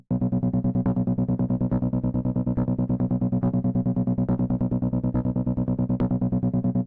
This loop has been created using program garageband 3 and plug inNative Fm8 of the Instruments using the harpist of the same one